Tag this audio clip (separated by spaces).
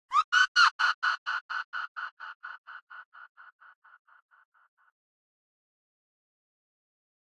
efx sound effect